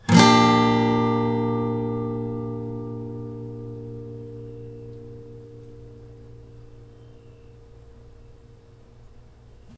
yamaha Bb6
More chords recorded with Behringer B1 mic through UBBO2 in my noisy "dining room". File name indicates pitch and chord.
yamaha, chord, multisample, major, guitar, acoustic